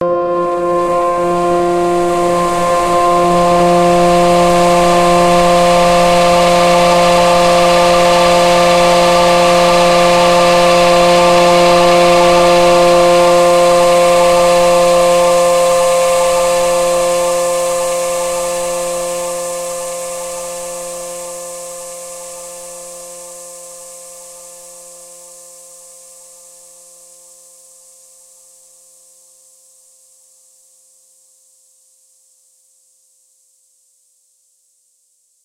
"Alone at Night" is a multisampled pad that you can load in your favorite sampler. This sound was created using both natural recordings and granular synthesis to create a deeply textured soundscape. Each file name includes the correct root note to use when imported into a sampler.